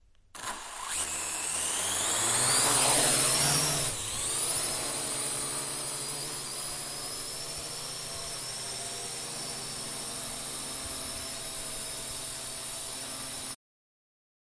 FXLM drone quadrocopter launch close T01 xy
Quadrocopter recorded in a TV studio. Zoom H6 XY mics.
close, launch, drone, helicopter, start, h6, plane, quadrocopter, xy, engine, swirl, propeller, flying